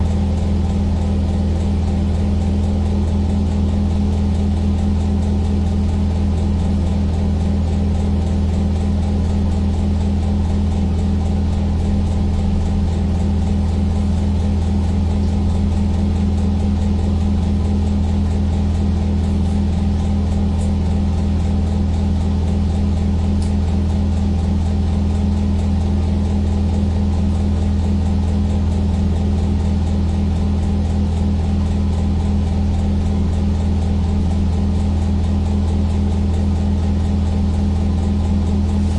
A sound of a faulty water pumper operating, recorded in a bathroom of a rented apartment. Equalized a bit in an attempt to decrease the background noise level.